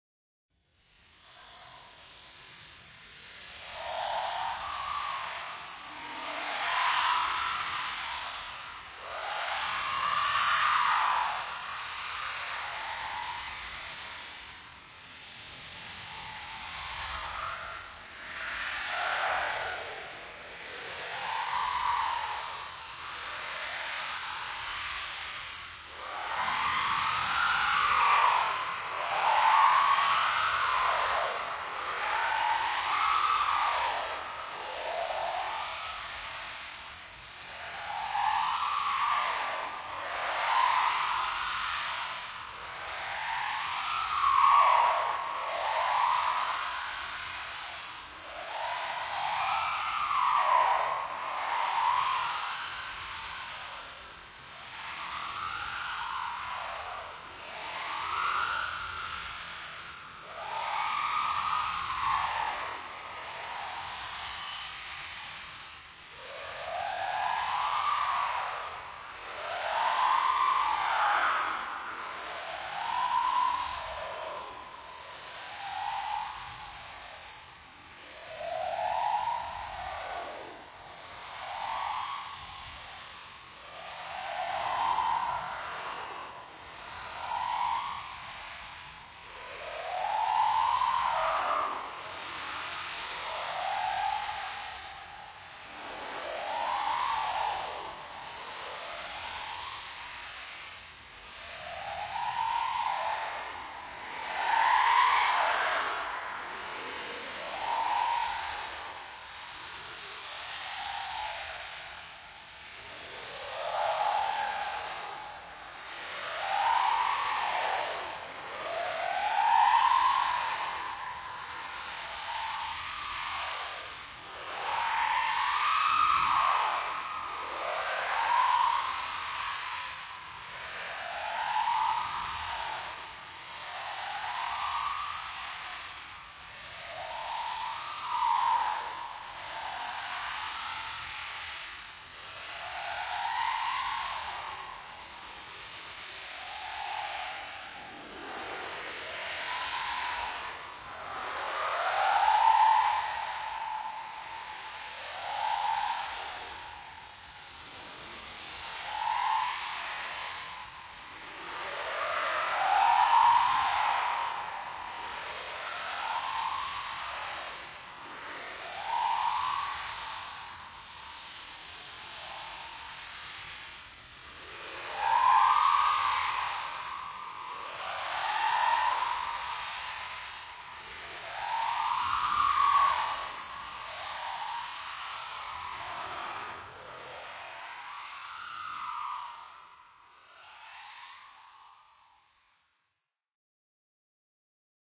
Curtains Stretchedx14 OctDown EQverb
Had another go at stretching this sound:
There is definetely a screming ghost in there!
After my first attempt I decided that I should stretch it a bit more, so I went for 14x. Also shifted to one octave lower, EQed to remove the high frequencies and add a touch of echo and reverb.
After stretching 14x the sound was too long so I cut it to about 1/2 of the resulting size.
artificial, fear, ghost, horror, paulstretch, remix, scary, scream, spooky, strange, streched, voice